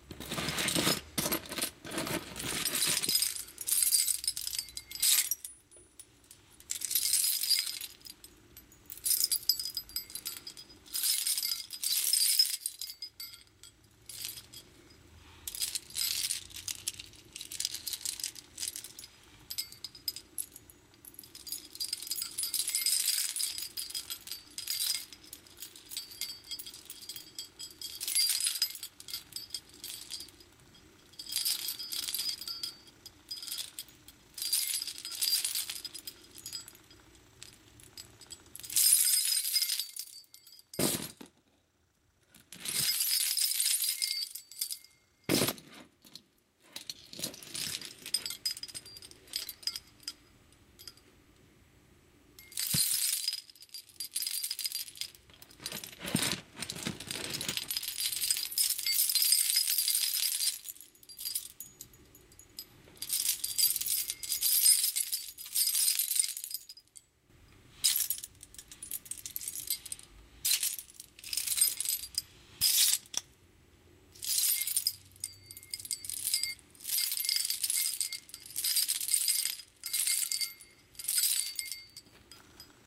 Jiggling Jewelery
Used a Sony Cybershot camera to record myself jingling jewelery.
Hope it's useful!
chain, jangle, jingle, jingling, keychain, keys, tinkle